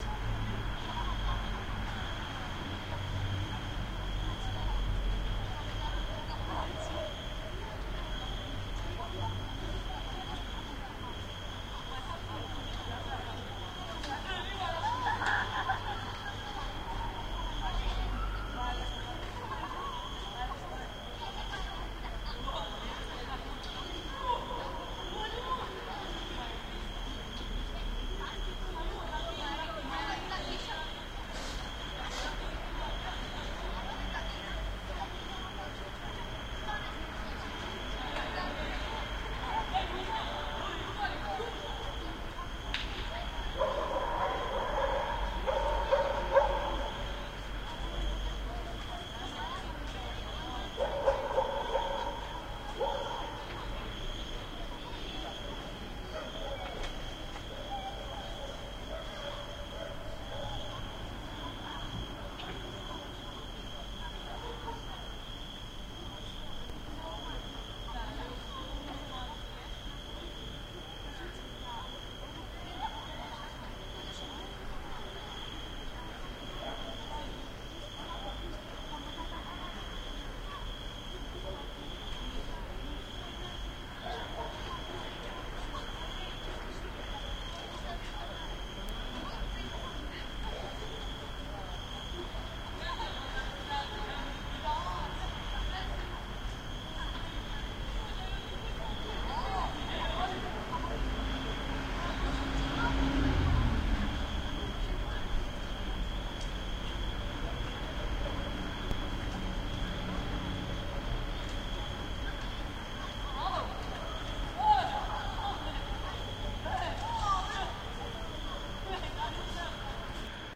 direct sound recording, computer and my DIY Mic, summer night back to my place
ambient, field-recording, movie-sound, nature, night